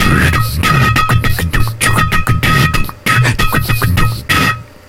2 bars of ITALIAN SODA WATER BEATBOX
Italian Soda Water Bottle Beatboxing (2 bars in 4/4)
beatboxing, found, percussion, sound